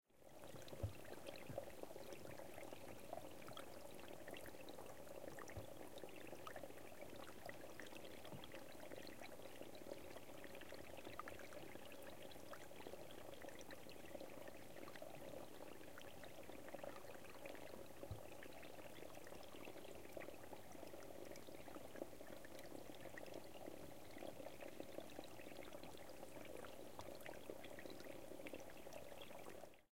stream finland4
A small stream in the woods of Finland.
field-recording, stream, nature, water, river, ambient